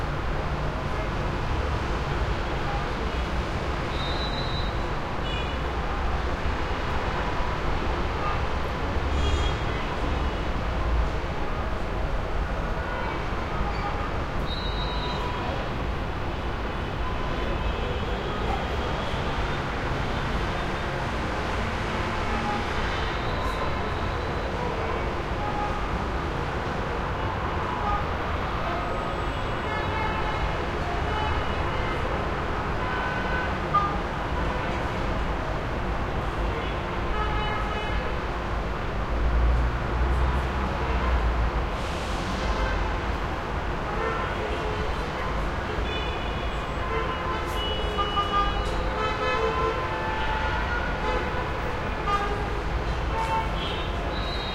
traffic heavy skyline Middle East from hollow bombed out stairwell airy spacey confined echo1 +some distant voices Gaza 2016
East, heavy, Middle, skyline, traffic